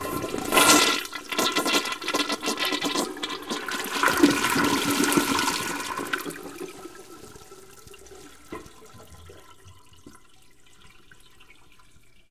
PFRamada201TankOff
This toilet was recorded with no water being supplied to the tank. Recorded in Pigeon Forge, Tennessee, United States, May 2010, using a Zoom h4 and Audio Technica AT-822 stereo microphone.